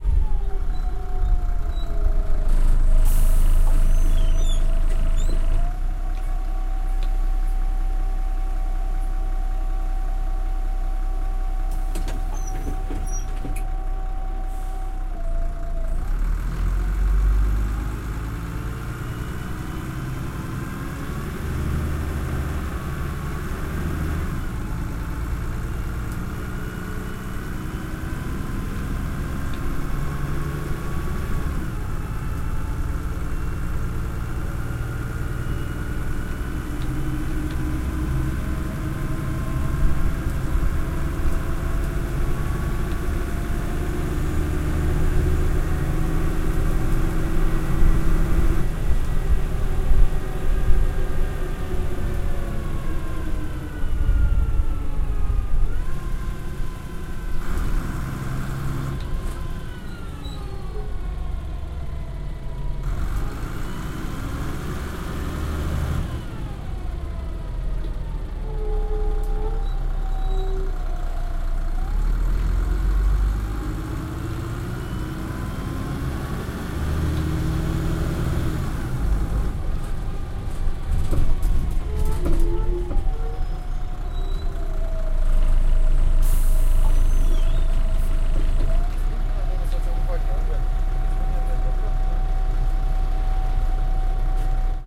Neoplan N4020 recorded using Zoom H4N
Bus, H4N